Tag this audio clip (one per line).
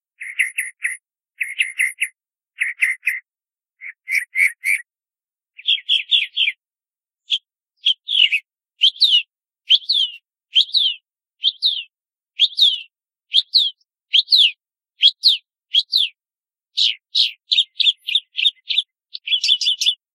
birds
nature
field-recording
birdsong
bird